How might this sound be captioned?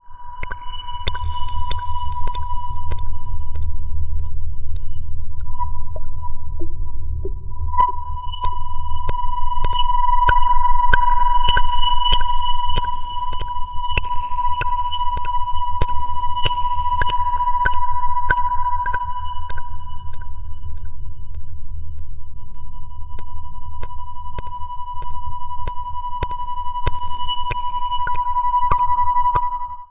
scaryscape liquidmistery
a collection of sinister, granular synthesized sounds, designed to be used in a cinematic way.